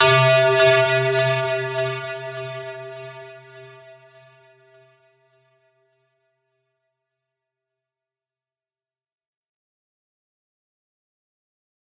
SynthClass+HardcoreDistDelay+RevbVenue
classic, electronic, FL, fx, loop, studio, synth